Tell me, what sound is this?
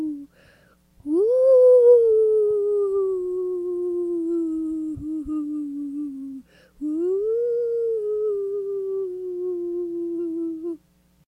eerie sound that defies explanation